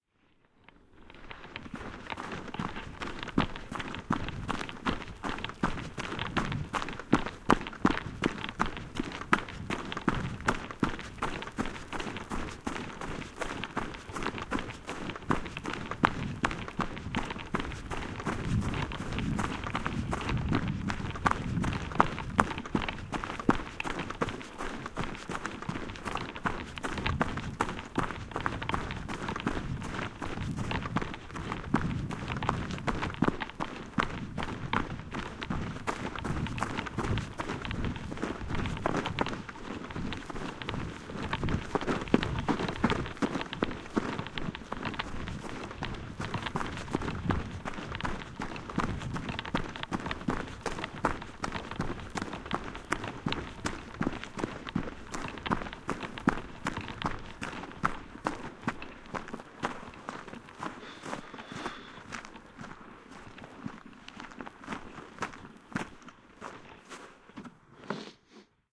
walking footsteps running